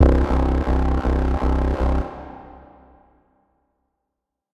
A rather phat synth bass - could be used as a lead - but only if your nutz - produced with Zeta from Cakewalk